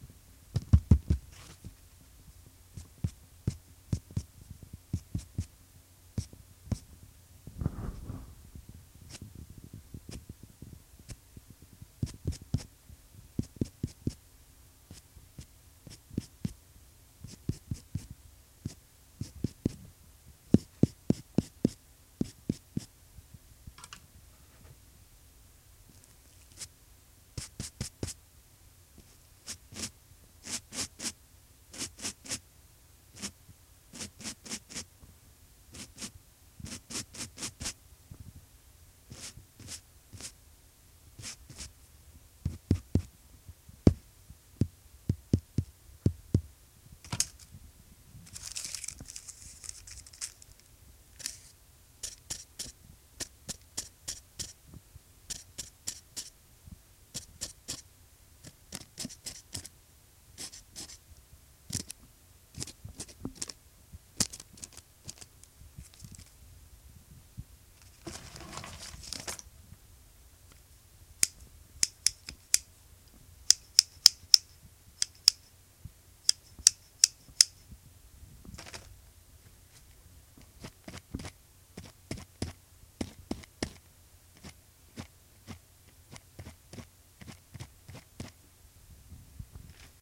Me tapping a series of objects on a block of heavy, toothy paper. A pencil eraser, metal nail file, empty straw wrapper, a metal hole-punch, and a crinkled wadded up paper.